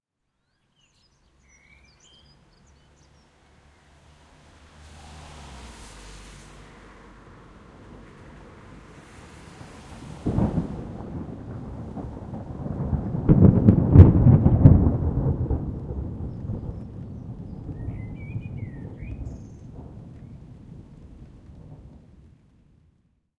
Our local Blackbird sings away,not going to be out done by the thunder.